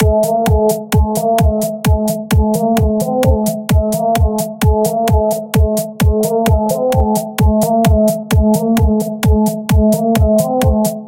Trance Bass Beat
Trance beat Loop
bass, beat, corner, desighn, loop, muffled, music, sound